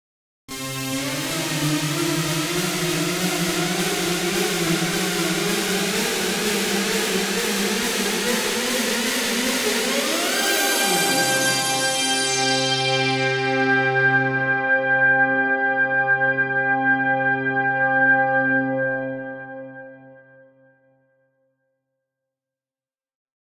Stinger Build Up
A quick nail biting sound which builds in speed to get anxiety up. Use it wisely.
creepy, scary, horror, tense, stinger